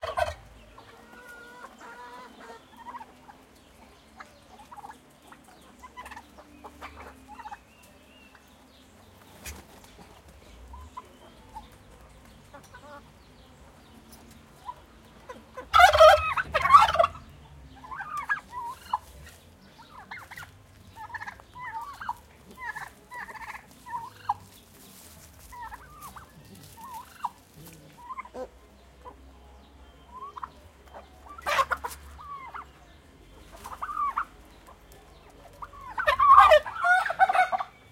Turkeys, loud excited Shouting x3, otherwise quiet or normal, some Steps on Straw, distant Birds, Cuba, rural Area, distant Radio Music